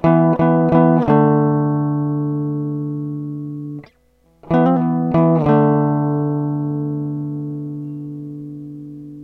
plucked guitar loop.
ryan played his electric guitar directly into my tascam us-122 usb soundcard. no amp, no mic, no processing.